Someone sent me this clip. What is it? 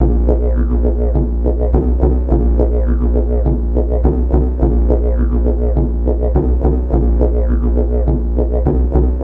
2 note riff 104bpm